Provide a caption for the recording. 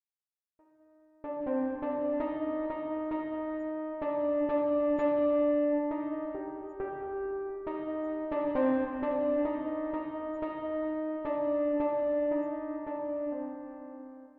Mary had a little lamb sad/creepy version
Mary had a little lamb in sad version using cello and piano